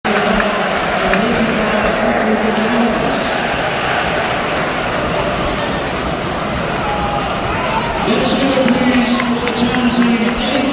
excited fortball crowd + tannoy
football, soccer, crowd, tannoy